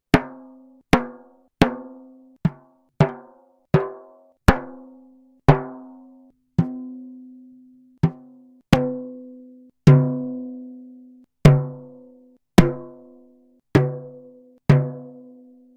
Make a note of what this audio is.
I recorded me banging some kind of drum (finding correct name would be nice):